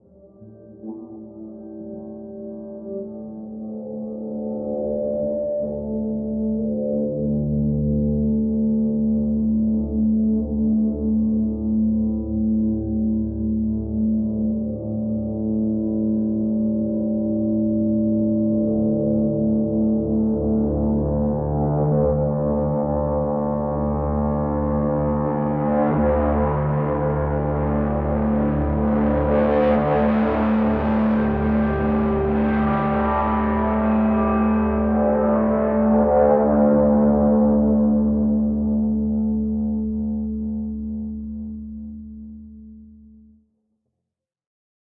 Ambient texture made from a bowed guitar. Grows gradually then dissipates.
ambience, ambient-guitar, bowed-guitar, guitar, sfx